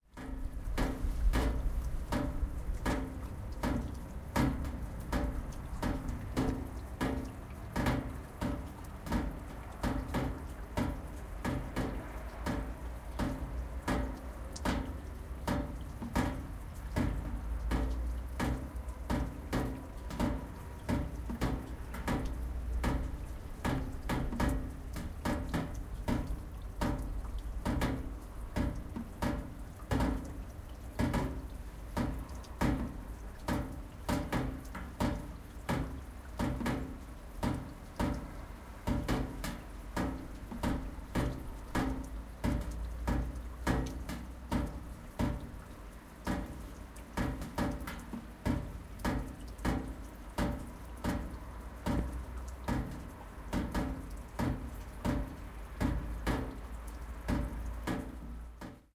10092014 pniów leaky drainpipe
Fieldrecording made during field pilot reseach (Moving modernization
project conducted in the Department of Ethnology and Cultural
Anthropology at Adam Mickiewicz University in Poznan by Agata Stanisz and Waldemar Kuligowski). Sound of water dripping from the leaky drainpipe in teh building of the old PGR in Pniów.
drip, dripipe, dripping, fieldrecording, lubusz, pni, poland, w, water